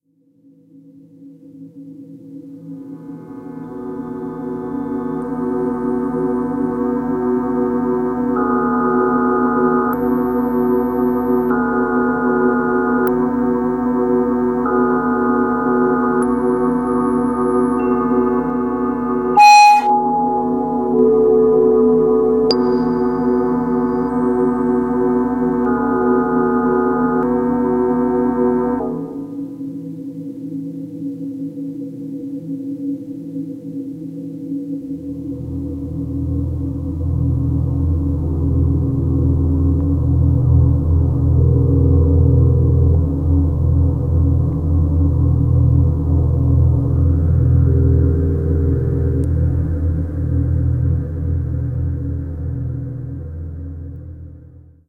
Simple space drone made with Roland JD-Xi using digital voices 1 and 2.